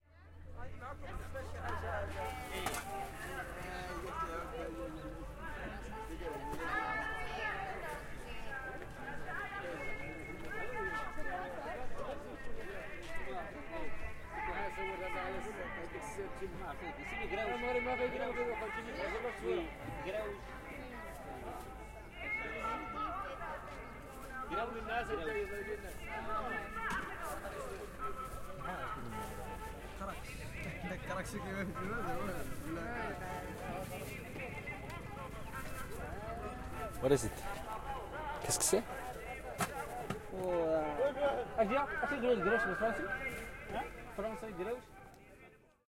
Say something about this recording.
open air market morocco 2
Recording of a open air market in morocco, on a hot sunny day. Walking around and hearing lots going on, almost chaotically
ambience, atmosphere, marketplace, ambiance, talking, people, market, noise, ambient, morocco, soundscape, field-recording